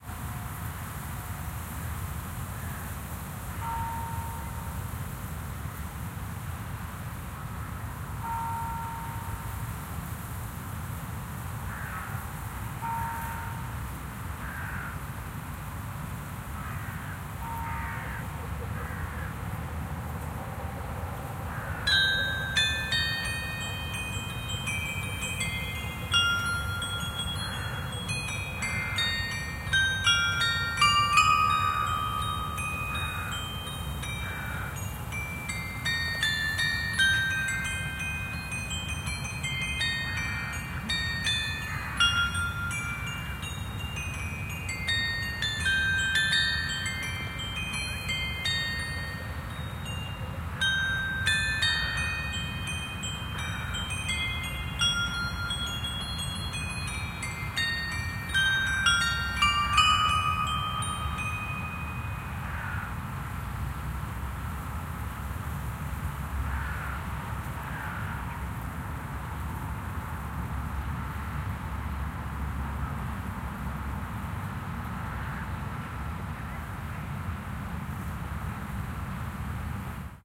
Sitting at the riverbank of the Elbe in Dresden, Germany. A Clarion is playing shortly after the Bells of a Church.